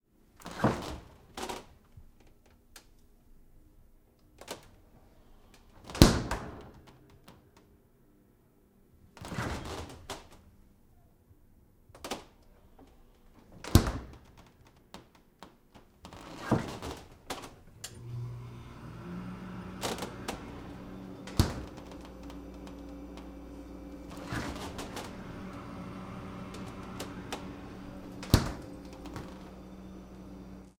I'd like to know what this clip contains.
Fridge open and close
Me opening and closing my fridge
doors, opening, fridge, appliance, closing, open, kitchen, refrigerator, close, refridgerator, door